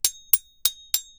Foley effect with the purpose of simulating bells